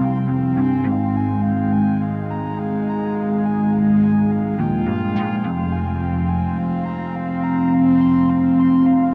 Ambient Loops IV LOOPB
Loop made with the EHX 22500 Looper and a Waldorf Blofeld.
Loop, Dark, Synthesizer, Synth, Ambient